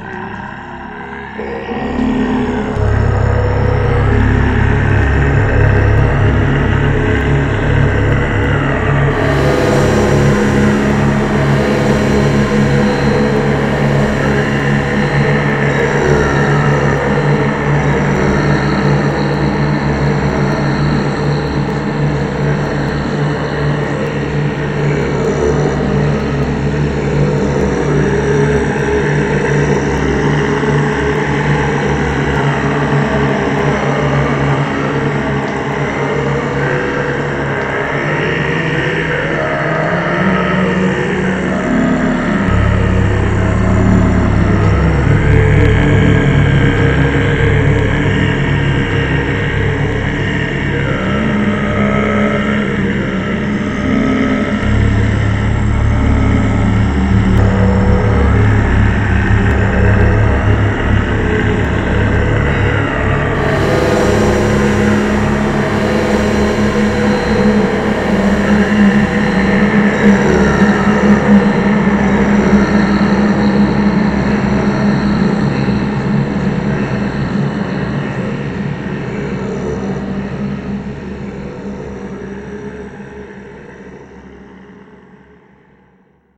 Ohm of Creation
The Om Mantra, also sometimes spelled Ohm or Aum is considered the sound that created the universe in Hindu and Buddhist mythologies.
sound, ohm, spiritual, mantra, cymatics, guttural, egg, creation, meditation, chant, om, universe, wave, alpha, beginning, buddhism, tibetan